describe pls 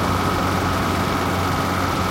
Truck engine idling.
This sound has been recorded using a lavalier microphone and edited for loop optimization in FL Studio.